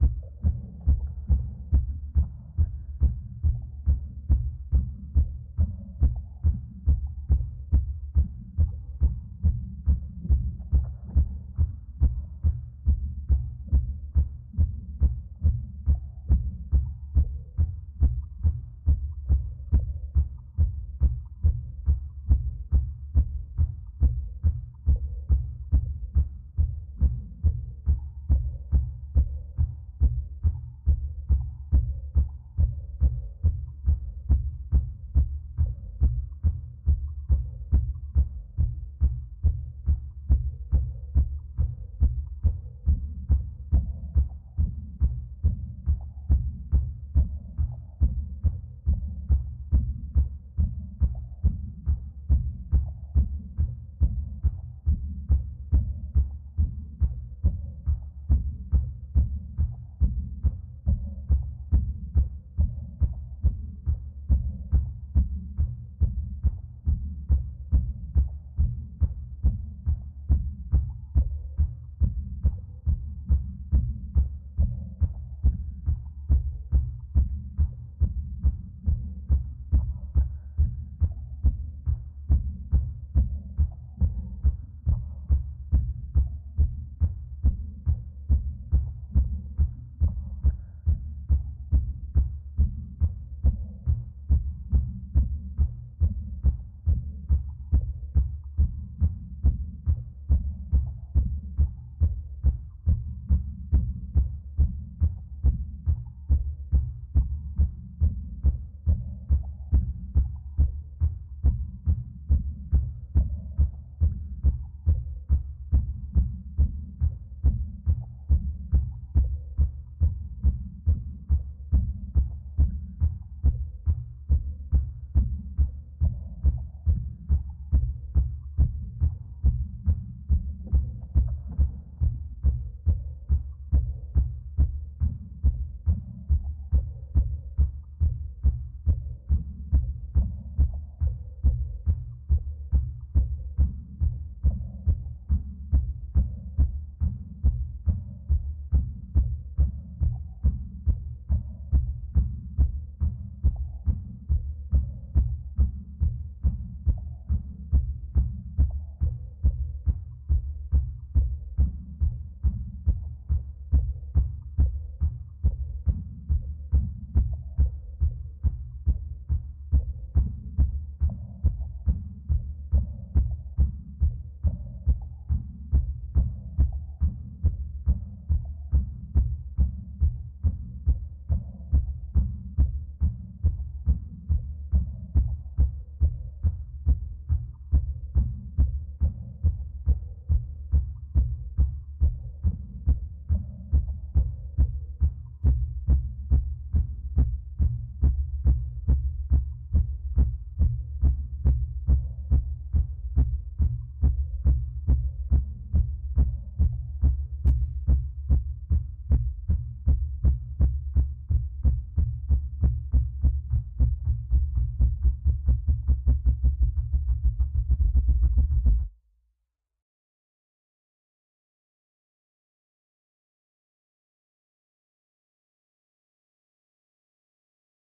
A heartbeat sound I made, complete with some gurgling, blood-rushing sounds. Just uploaded a version without the gurgling, in case you prefer the way that sounds.
To make this, I took a kick-drum sample and a snare-drum sample, and made a loop of kick-snare, kick-snare, kick-snare, over and over. After that, I EQ'd out the more drum-like parts of each sample, added reverb to dull it, a chorus plugin and some compression to remove some of the drums' attack...basically processed the hell out of them.
To get the blood gurgling sound, I filled a water-bottle almost all the way full and turned it sideways, so I had a massive air-bubble floating around. I then tilted the bottle back and forth in front of my Blue Yeti USB condenser mic, so you could hear the air-bubble swishing. I had to be pretty gentle, because if you rock the horizontal bottle too much, the bubble moves too fast and makes a fake-sounding "gloomp."